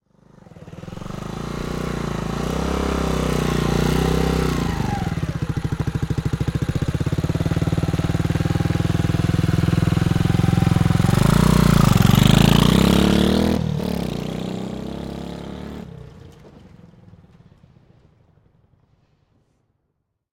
Jerry Honda 125 slow follow & ride off
The sound of a Honda 125cc motorcycle slowly following closely and then riding off
125cc, motorcycle, Honda, motorbike, rev, bike, engine